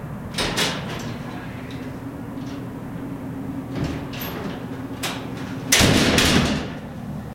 Heavy Metal Door (Close)
Close, Door, Heavy, Metal